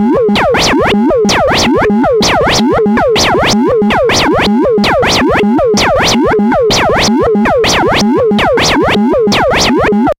Experimental QM synthesis resulting sound.